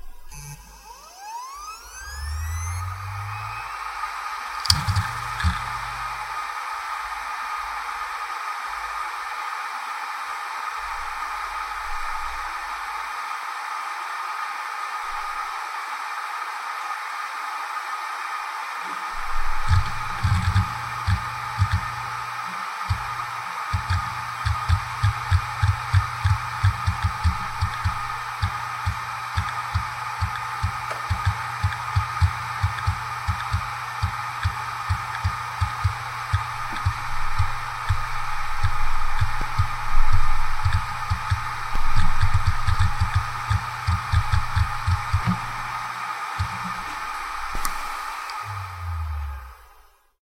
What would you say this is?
Samsung P80SD - 7200rpm - FDB
A Samsung hard drive manufactured in 2006 close up; spin up, writing, spin down.
This drive has 2 platters.
(spinpoint hd160jj)
machine, rattle